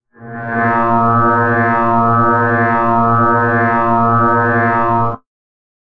magnetic field 2
SFX suitable for vintage Sci Fi stuff.
Based on frequency modulation.